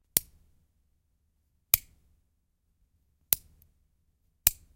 switch turn twist light switch on, off
turn, light, twist, off, switch